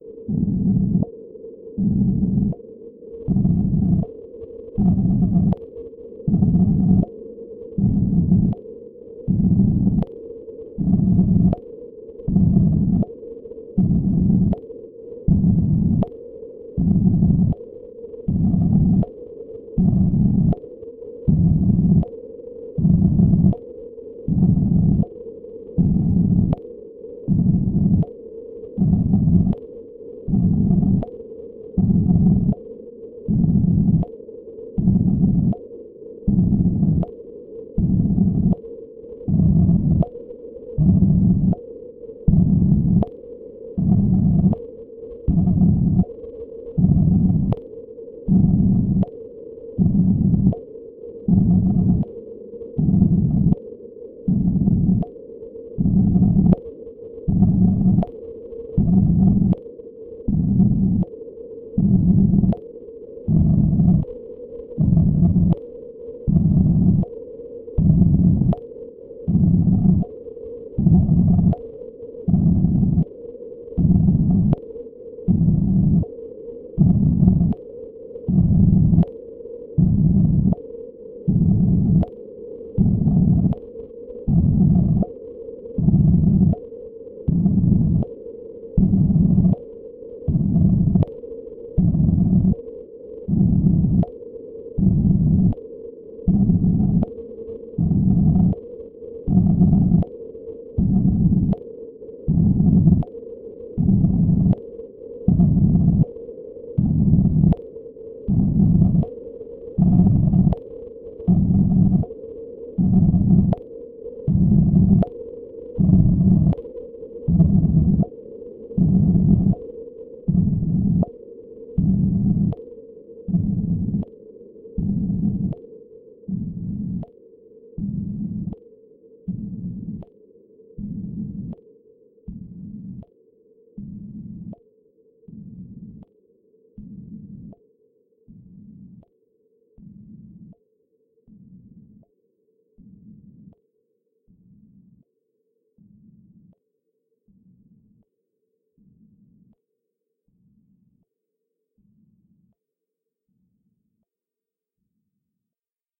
Noise bursts created with a slow stepping random LFO with some delay and distortion. Created with RGC Z3TA+ VSTi within Cubase 5. The name of the key played on the keyboard is going from C1 till C6 and is in the name of the file.